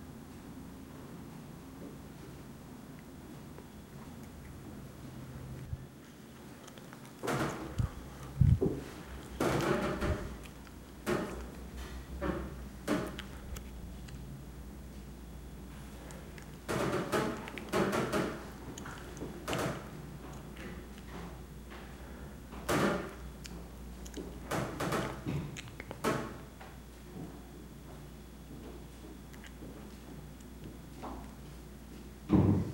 Wooden creak
Suspense, Orchestral, Thriller
Suspense, Thriller